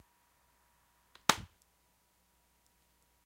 slam laptop
when you're angry and you slam the laptop